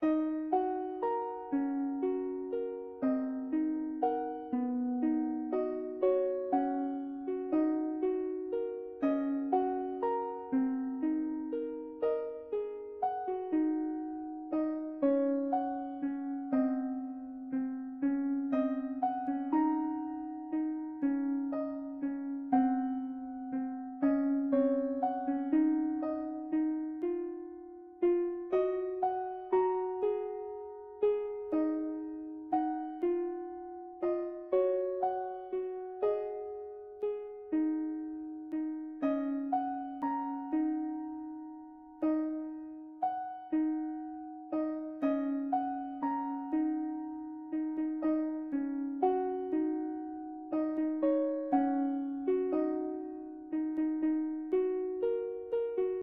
sad rpg-town background
Composed as an melancholic background music theme for a rpg town.
rpg, music, thoughtful, fantasy, dreamy, video-game, melancholic, background-music, town, game